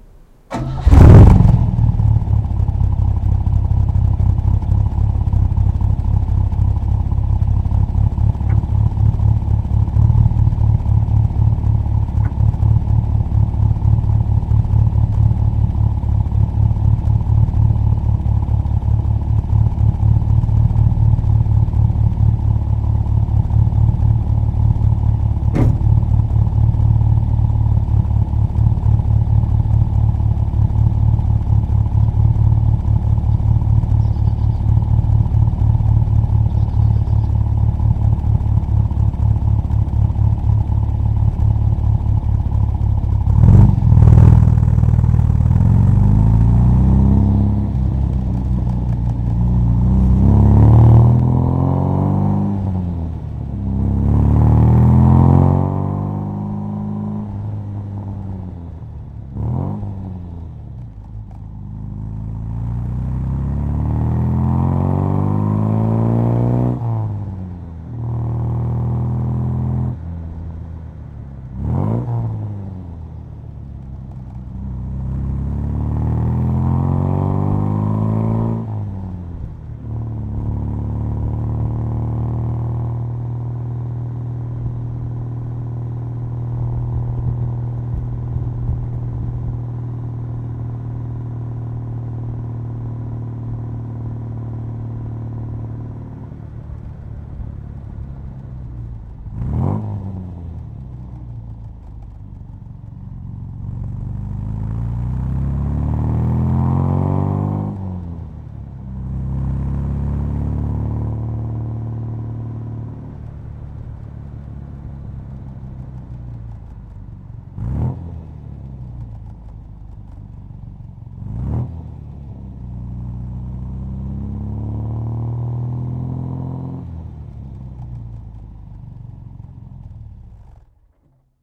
MGB at exhaust start drive shift
Recorded with the mic on the rear bumper of a 1974 MGB sportscar. Start, drive off, up through gears, steady state, and shut-off. Very throaty period correct exhaust note appropriate for British sports cars from the 30's through 80's. Recorded outside of car so would work best for driving follow shots, drive by's, or car entering/leaving a scene. Think of a fighter pilot driving up in his MG car to the airfield in Battle of Britain; yeah, that kind of scene!
british-sports-car, classic-car-exhaust, exhaust-sound, MG, MGA, MGB, MGTC